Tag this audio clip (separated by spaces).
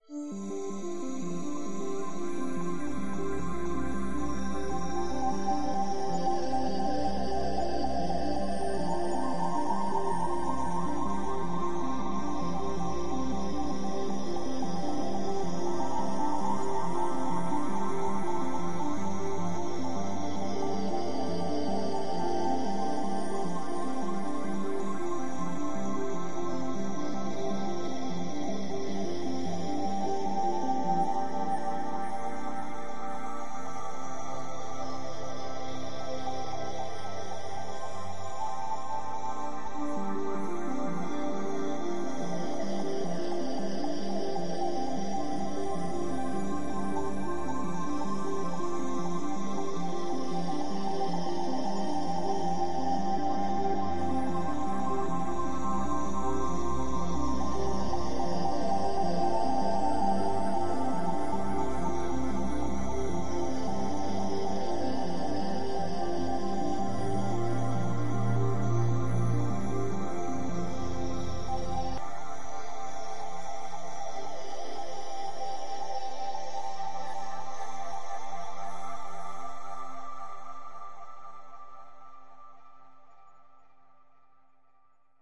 ambient background minimal music synthesizer